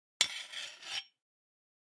Sliding Metal 01
metal, shiny, shield, clang, steel, blacksmith, iron, slide, rod, metallic